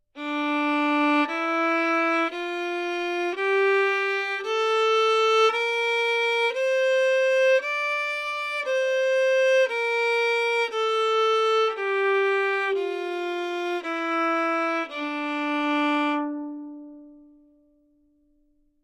Violin - D natural minor
Part of the Good-sounds dataset of monophonic instrumental sounds.
instrument::violin
note::D
good-sounds-id::6328
mode::natural minor
Dnatural, good-sounds, minor, neumann-U87, scale, violin